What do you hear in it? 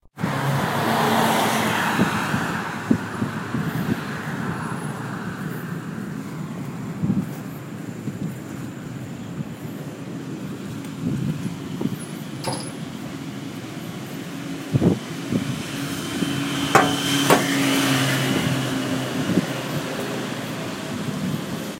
the sound while walk by the side of a road
using an iphone